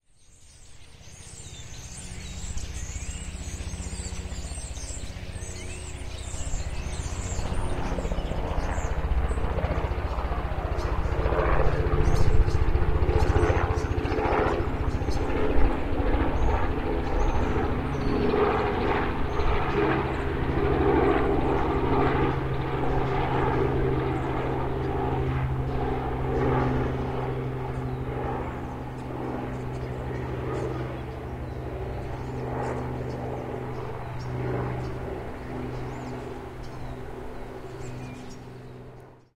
birds + helicopter 01
The sound of birds, with a helicopter in the distance.
chirping, bird, atmosphere, helicopter, ambient, birds, field-recording, ambiance, birds-chirping, outdoors, nature, ambience